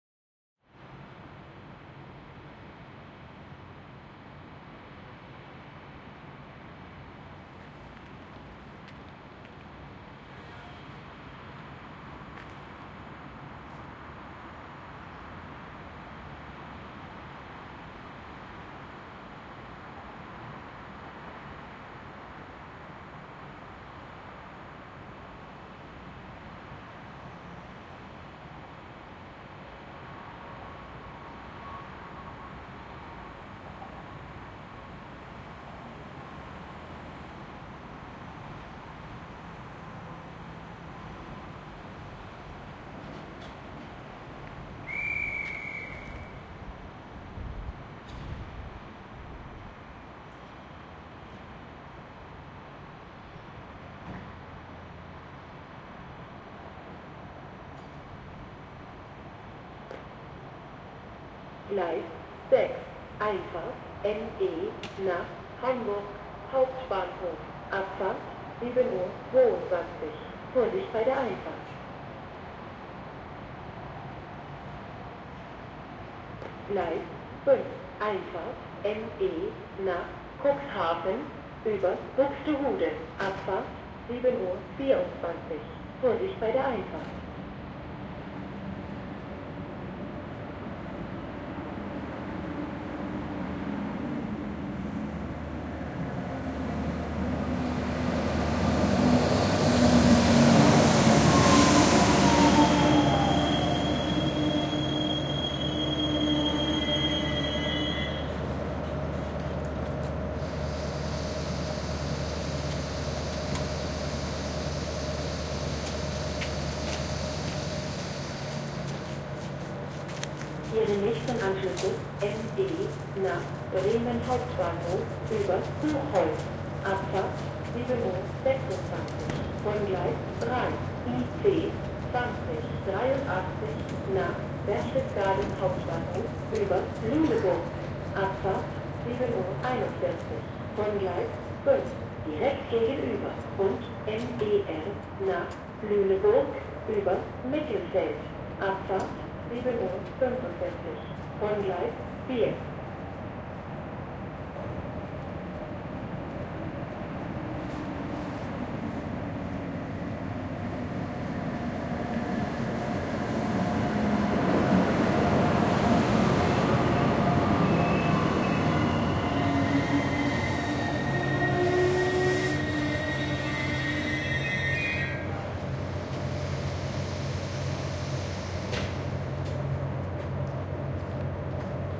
Waiting at Train Station Hamburg-Harburg
Many things are happening here: Atmosphere at the train-station Hamburg-Harburg, announcement and 2 Regio-trains ariving
Station, Train